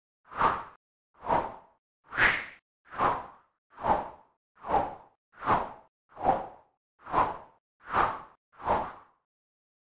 Abstract throw swishes